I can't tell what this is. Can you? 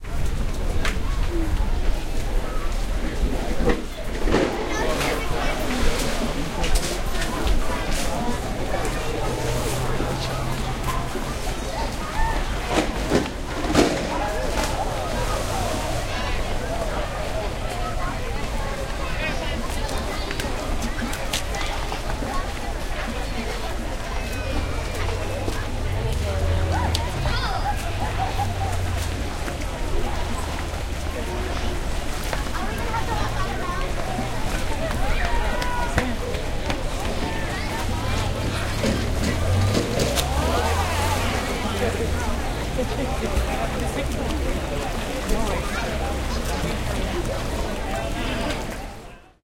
Across from diving board
RECORDING MADE AT OPPOSITE SIDE OF POOL FROM DIVING BOARD. Recordings made at Barton Springs,a large naturally occurring swimming hole in Austin Texas. Stereo recording made with 2 omni lav mics (radio Shack) into a minidisc. transfered via tascam dm24 to computer for editing.